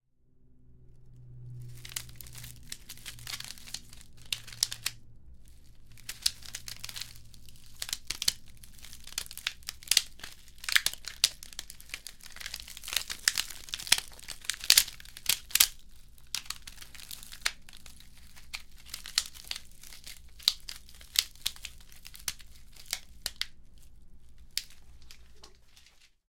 planta, crecer, crujir